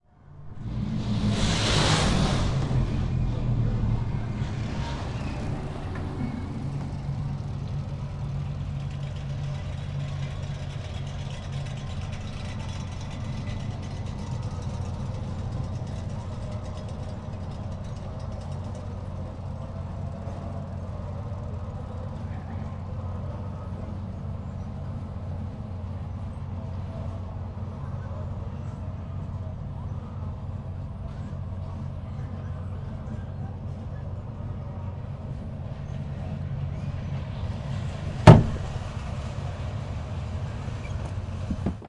machine squeaks to stop
A machine squeaks to a stop type sound. Recorded on plextalk ptp1.